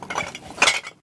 rattling glass bottles impact
Like with the cans I kicked a glass bottle bank and some of the bottles inside toppled over.
tumble, glass, bottle, kick, recycling, impact, rattle, topple